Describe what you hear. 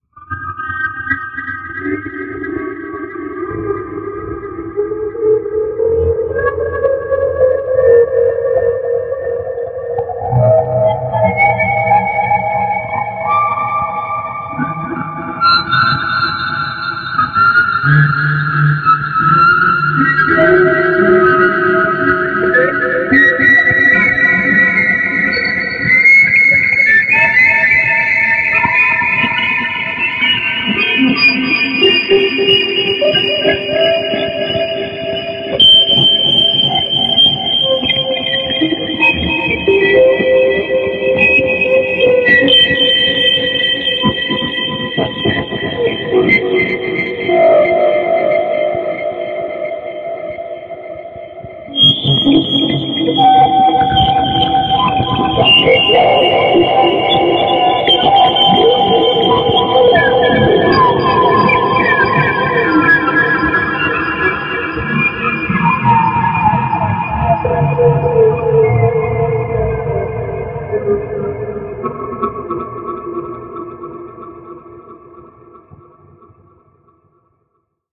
Samurai Jugular - 34
A samurai at your jugular! Weird sound effects I made that you can have, too.
effect, sound, trippy, sci-fi, time, dilation, high-pitched, sfx, sweetener, experimental, spacey